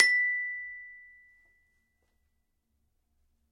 Samples of the small Glockenspiel I started out on as a child.
Have fun!
Recorded with a Zoom H5 and a Rode NT2000.
Edited in Audacity and ocenaudio.
It's always nice to hear what projects you use these sounds for.
campanelli, Glockenspiel, metal, metallophone, multi-sample, multisample, note, one-shot, percussion, recording, sample, sample-pack, single-note